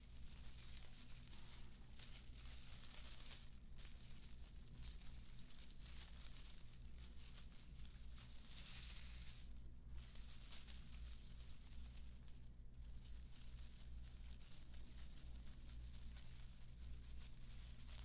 leaves in movement
leaf, leaves, movement